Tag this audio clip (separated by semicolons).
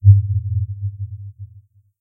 sea; vibrations; monster; planet; undersea; creature; underwater; alien; sea-monster; science-fiction; sci-fi; danger